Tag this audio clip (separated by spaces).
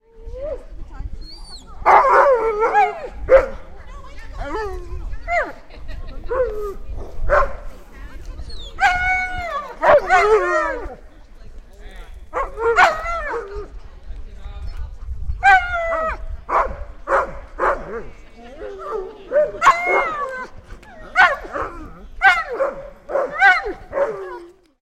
bark dog howl husky malamute moan wolf